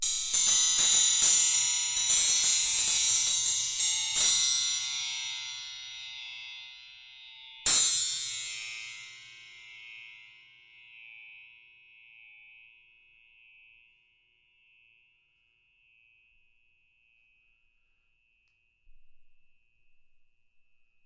Clang swing 1 racket
A metal spring hit with a metal rod, recorded in xy with rode nt-5s on Marantz 661.
Swinging backwards and forwards
Metal-spring, clang, untuned-percussion, discordant